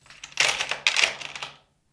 different noises produced with the screws, nails, buts, etc in a (plastic) toolbox
cabinet, mechanics, toolcase, garage, tools